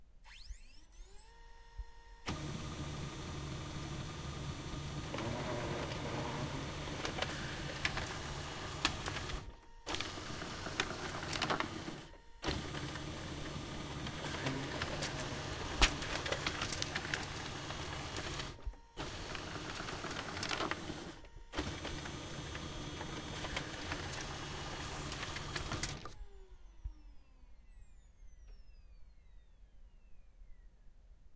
A Samsung monochrome laser printer. Recorded with a Zoom H1.
laser, Monochrome, paper, printer